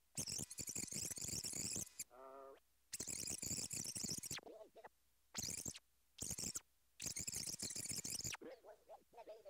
Tape squeak
sound of a vocal recording being fast-forwarded on a cassette tape.
squeak, tape